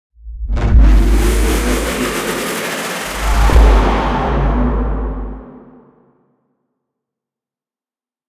GASP Spacecraft Takeoff
Sound FX for a spacecraft taking off and flying away.
Alien, FX, Spaceship, Takeoff